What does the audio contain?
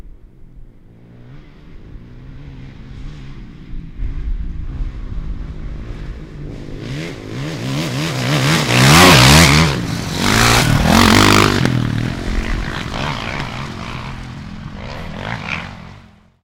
motorcycle dirt bike motocross pass by fast2
motorcycle dirt bike motocross pass by fast